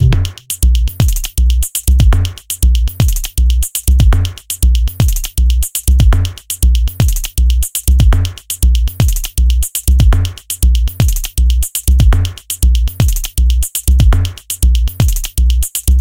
Loop created in NI Reaktor
loop, reaktor, glitch